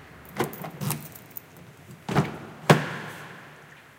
20061030.car.door.bin
car key enters the lock and mechanism of aperture, binaural / soundman okm into sony MD / llave entrando en la cerradura y sonido del mecanismo de apertura
binaural, car, door, lock, mechanical